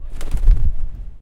Beating wings of a pigeon
The taking off of a pigeon outside the bar.
outside-bar, wings, campus-upf, pigeon, UPF-CS12